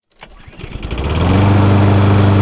CM Lawnmower Startup 1
The sound of a lawn mower starting up.